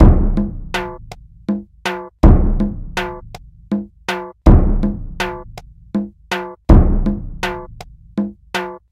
Tribal-Bass
A simple tribal rhythm with bass percussion
Bass, Rhythm, Tribal